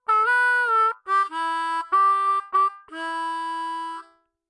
Harmonica Rift Key Of C 13
This is a recording of a rift I had been practicing.
C, Harmonica, Key, Rift